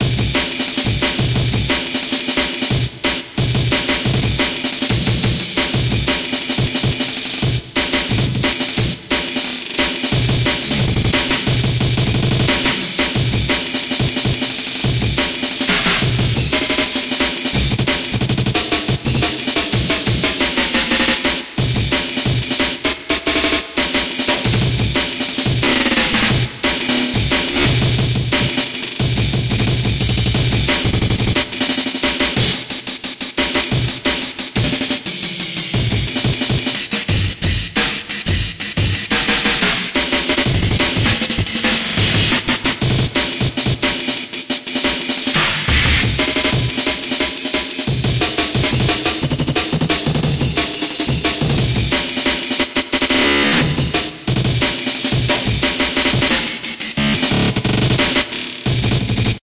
breaks feb28
Insane amen break programming with some decent reverb. Sorry, again not sure of the bpm, but it should say somewhere in the ID3 tag what it is.
fast, break, amen, beat, breakcore